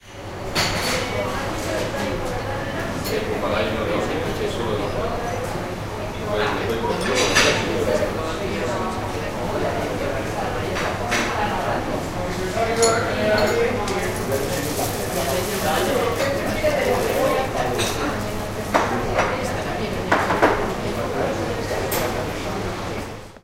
12 ambiente cafeteria
Grabación del ambiente ruidoso de la cafetería del campus de Upf-Poblenou. Grabado con zoom H2 y editado con Audacity.
Recording of the cantina of Upf-Poblenou Campus. Recorded with Zoom H2 and edited with Audacity.
ambiente, cafeteria, campus-upf, gente, ruido, UPF-CS13